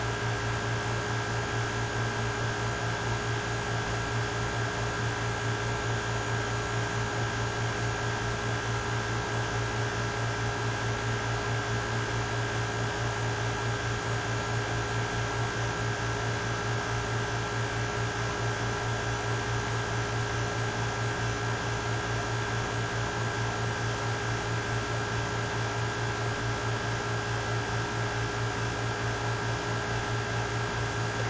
A recording of an air conditioner at night.
air, ambiance, ambience, city, conditioner, field-recording, night